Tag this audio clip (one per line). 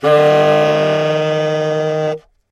baritone-sax
jazz
sampled-instruments
sax
saxophone
vst
woodwind